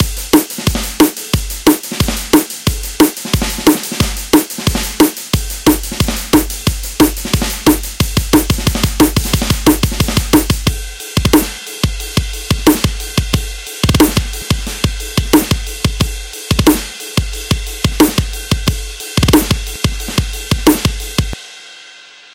Drum 'n bass/metal hybrid drum loop, 180bpm
Drum 'n bass and metal hybrid drum loop.
180bpm.
drum-loop, drums, quantized, 180-bpm, loop